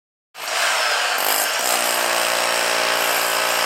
Hand digging machine
digger, Machine, noise, repair